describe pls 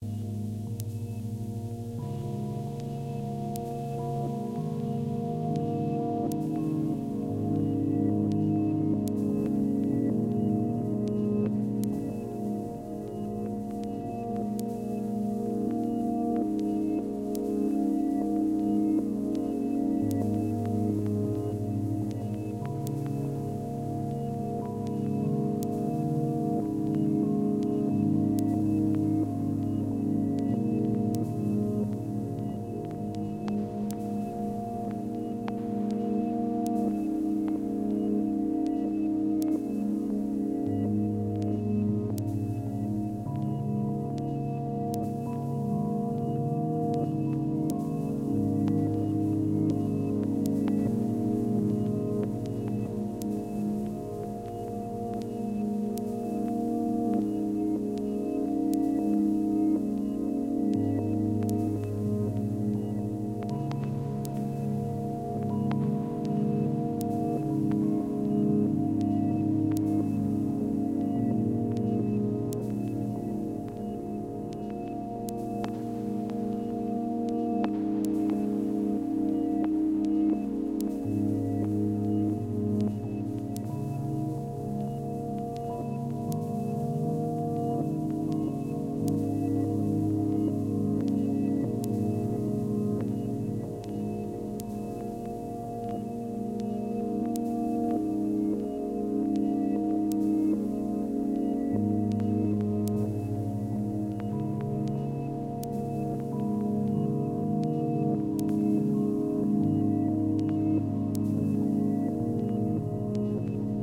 Mist rises from the imaginary shores of the electric piano.
ambient, glitch, rhodes